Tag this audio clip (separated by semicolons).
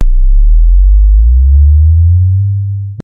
blast; emp